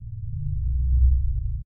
Synthetic heartbeat 3
A synthetic pure/only bass pulse 3
heartbeat, bassfull